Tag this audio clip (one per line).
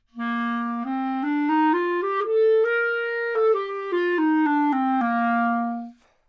neumann-U87
scale